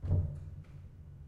Pedal 15-16bit
piano, ambience, pedal, hammer, keys, pedal-press, bench, piano-bench, noise, background, creaks, stereo
ambience, background, bench, creaks, hammer, keys, noise, pedal, pedal-press, piano, piano-bench, stereo